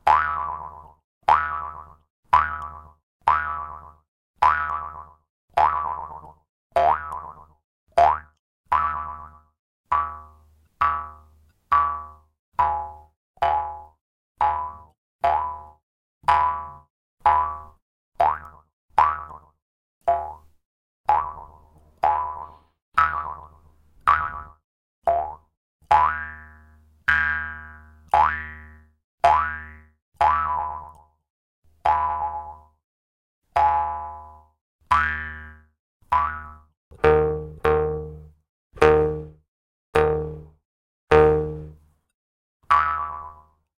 Jaw Harp
Cartoon boing sounds.
Recorded with Zoom H4n
jaw, funny, jew, silly, mouth, bounce, cartoon, twang, boing, harp